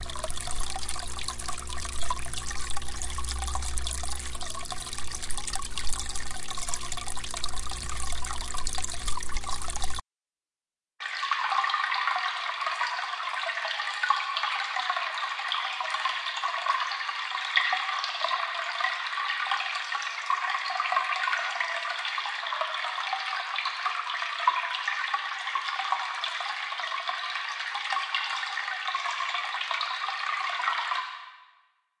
mini waterfall prefx postfx
Sound recording of a two tier mini waterfall into my small fish pond. Recorded with a Tascam DR-07 Mk II. First 10 seconds is the raw recording. Note the 60 Hz hum due to the water pump being just under the water fall splash area. The last 20 seconds is the processed audio using Ableton Live 8 affects rack and the following affects.
1. Notch filter – 60 Hz applied two times.
2. LP filter –knee at 1 Khz
3. HP filter-knee at about 3.2 Khz
4. Granular Delay – bubbling sound
5. Chorus Audio Effect-snap, crackly sound.
6. Reverb-cave echo affect-high wet/dry
7. Utility- for stereo width
Tascam, field-recording, PreFX, PostFX, DR-07-Mk2, Waterfall, Mini